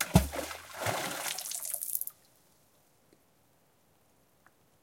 Tossing rocks into a high mountain lake.
water, splashing, percussion, bloop, splash